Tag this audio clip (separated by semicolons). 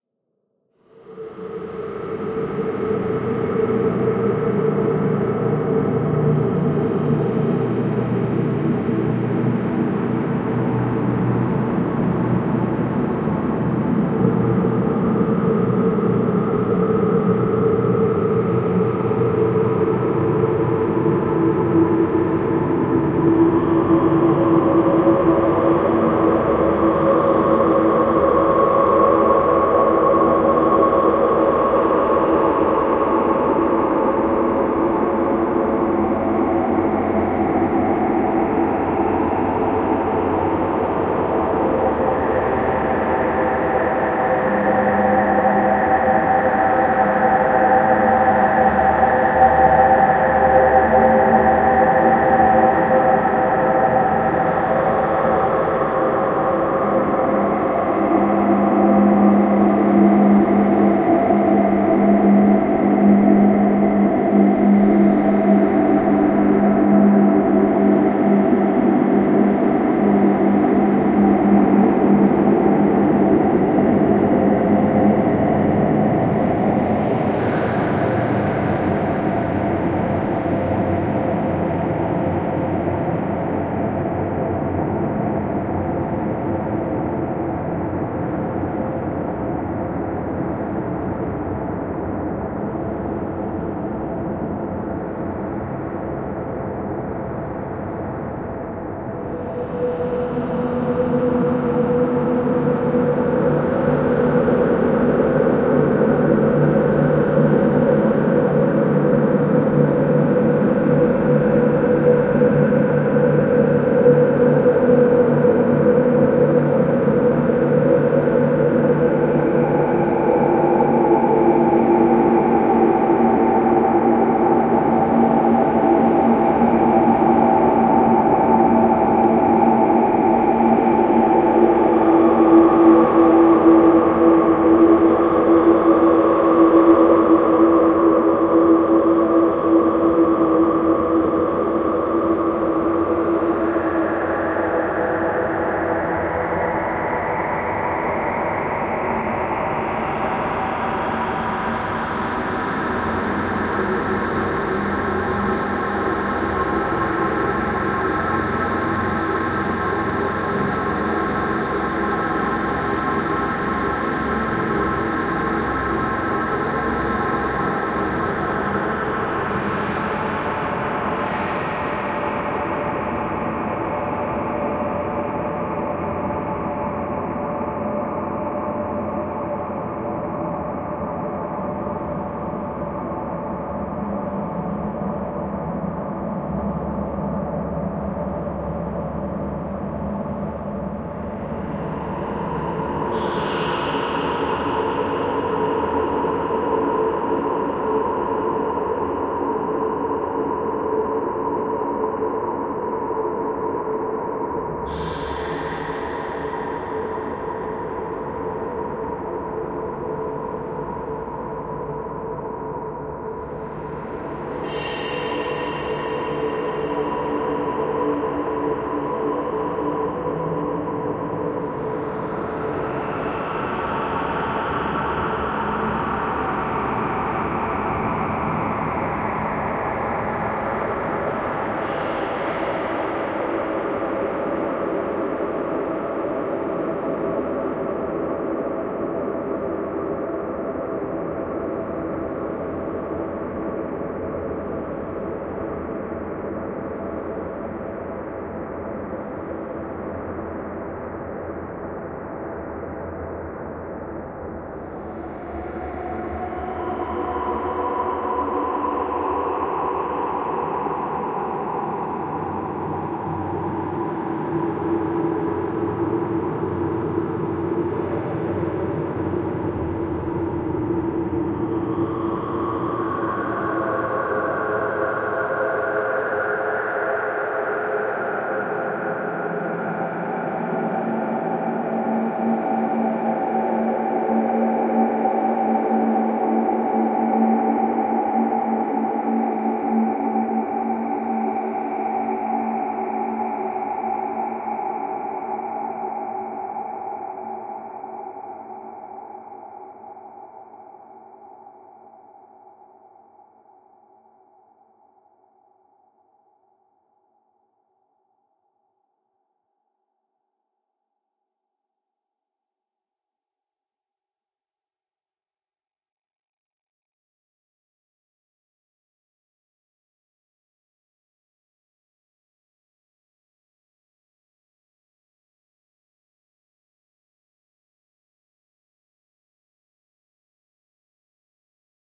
ambient; artificial; digital; divine; dream; dreamy; drone; evolving; experimental; freaky; granular; multisample; organ; pad; reaktor; smooth; soundscape; space; sweet; synth